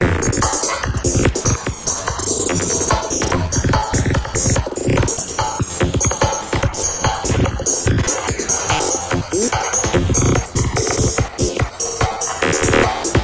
These are heavily processed beats inspired by a thread on the isratrance forum.